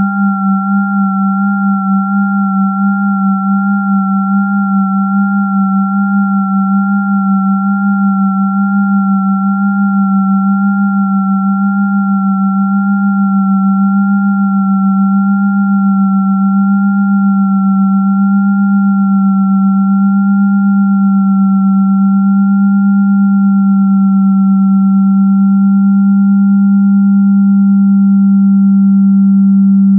Long stereo sine wave intended as a bell pad created with Cool Edit. File name indicates pitch/octave.
synth, bell, pad, multisample